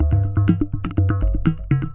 Rhythm a Akm
A rhythm a created using soft synth with a little reverb on it.